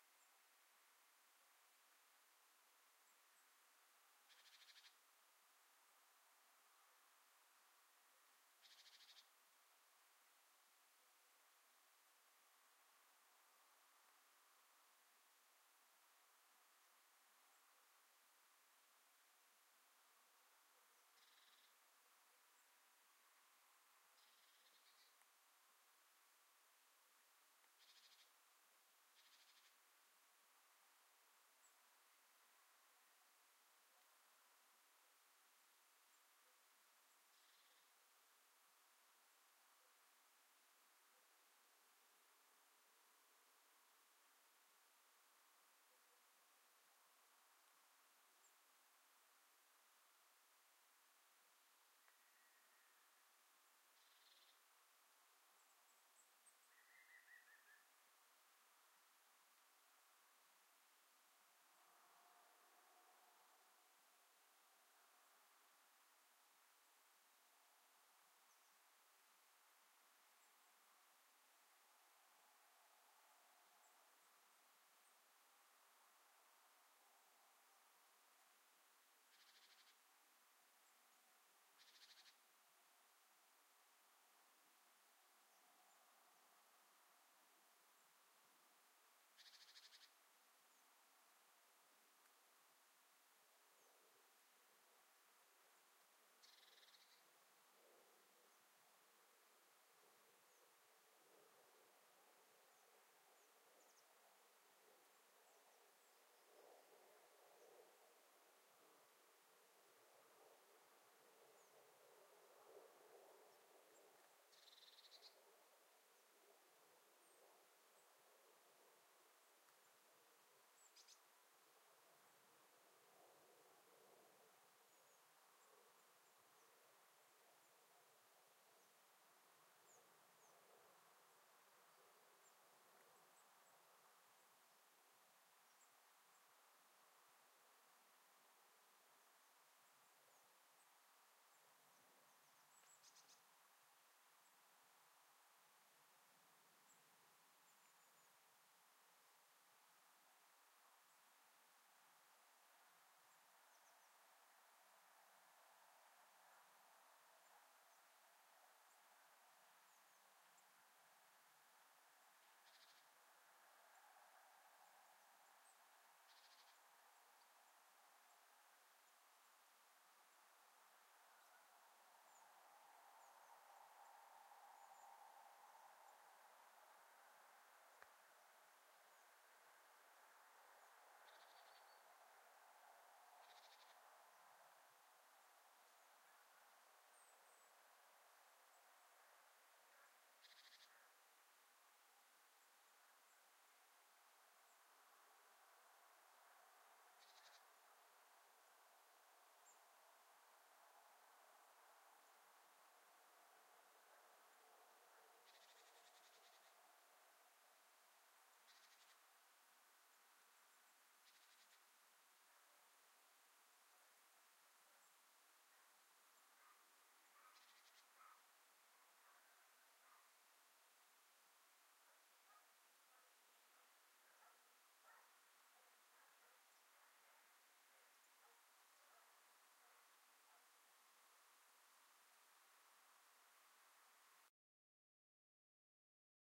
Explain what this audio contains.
Forest 6(traffic, cars, birds)
nature, ambient, forest